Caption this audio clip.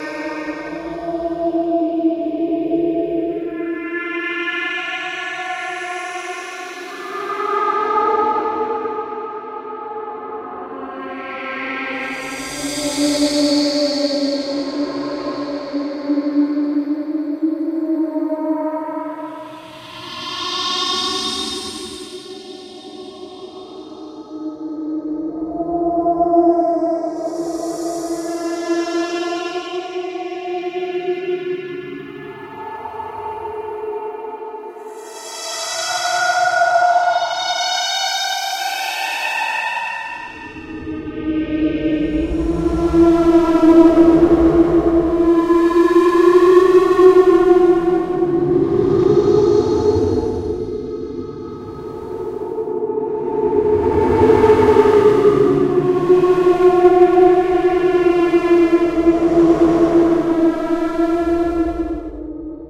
Creepy Cavern
A weird sound I made in Audactiy with just my voice xD
Creepy, Weird, Factory, Audacity, Synthetic, Machinery, Halo, Machine, Modulation, Voice, Strange, Sci-Fi